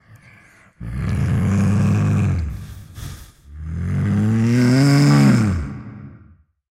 One of the "Bull" sounds I used in one play in my theatre.